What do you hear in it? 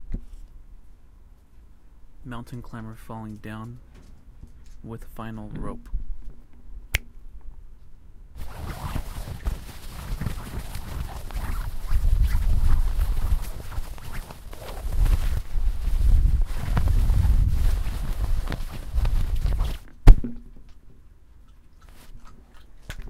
Mountain Climber or Skydiver Opening Parachute. Foley Sound
This is a sound of a Mountain Climber or Skydiver Opening Parachute. Using a Wind and Vinyl to mimic the sound. And as usual have fun filming!
Recording Tech Info:
Zoom H1
Stereo
Low-cut Filter:Off